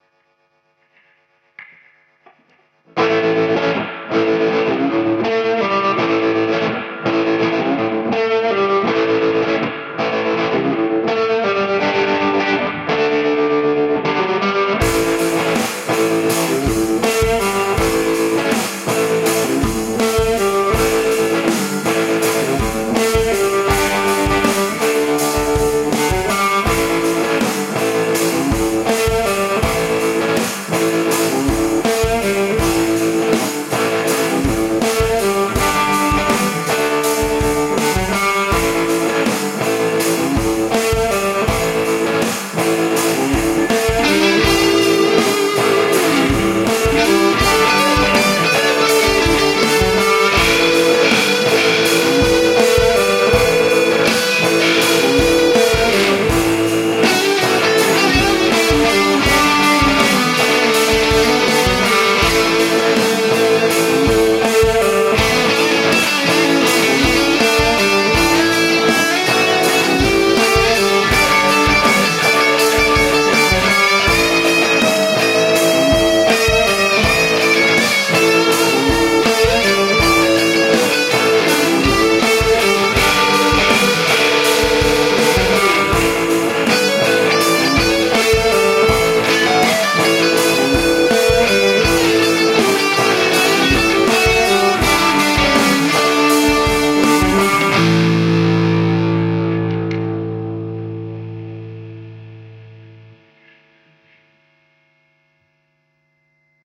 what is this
Ableton Live rock garage jam
THis is simple rock-guitar improvisation at home with abletone life. Gnashing guitar riffs and melodic solo part.
Key - A.
Tempo - 81 bpm.